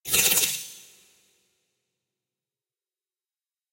Sound effect of something or someone disappering/running away.